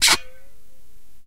vacuum flask - twisting cap 01
Twisting the plastic cap of a metal vacuum flask.